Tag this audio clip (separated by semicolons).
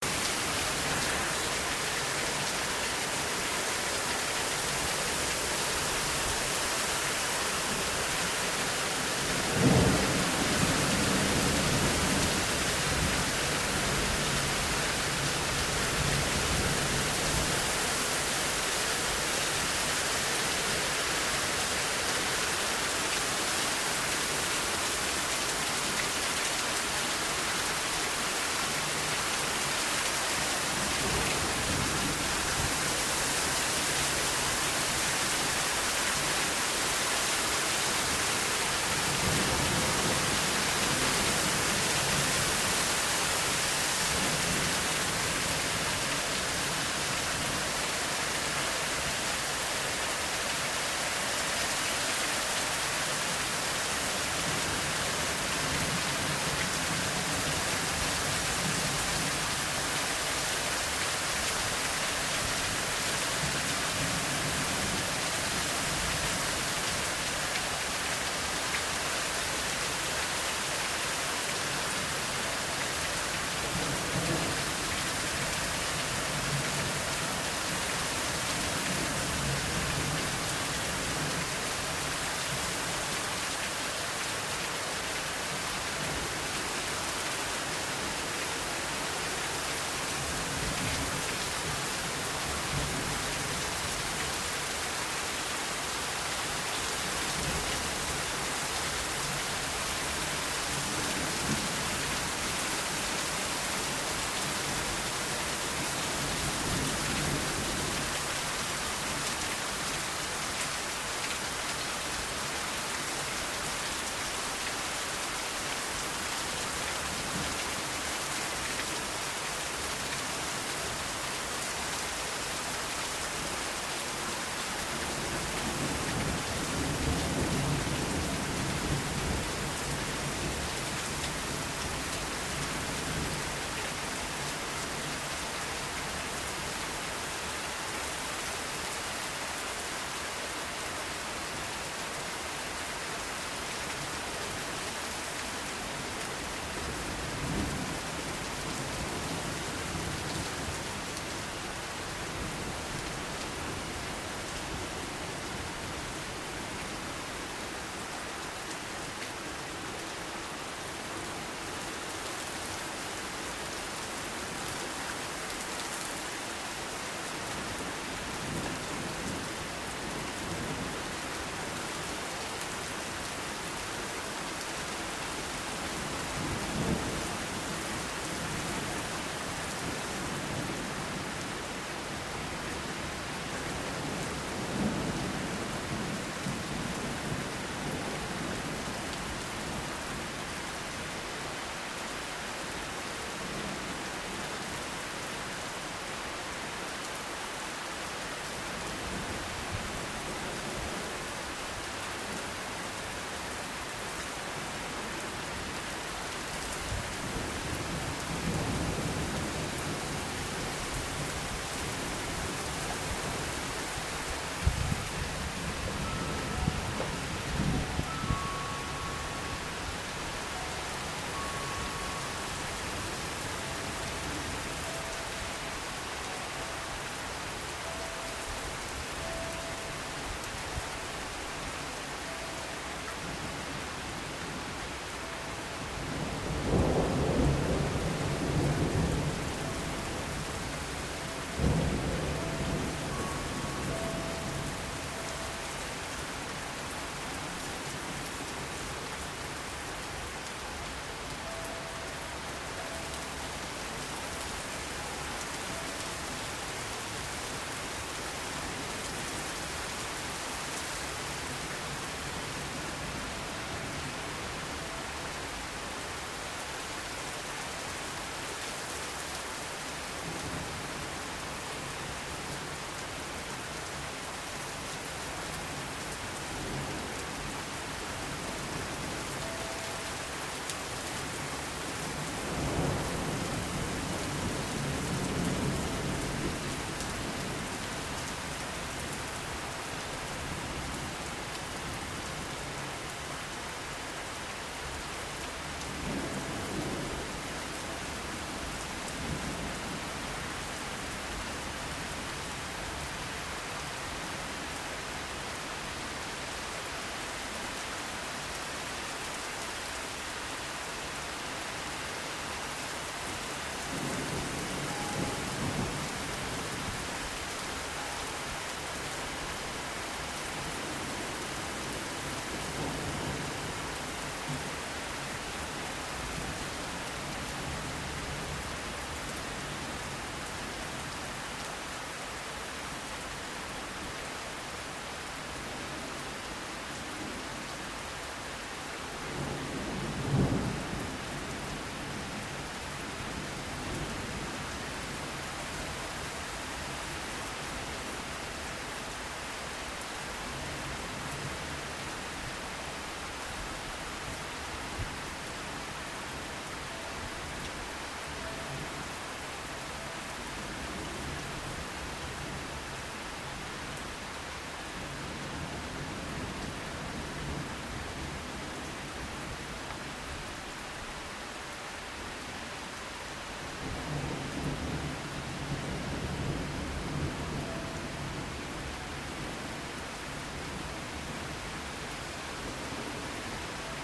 Rain; Storm; Weather; Thunderstorm; Thunder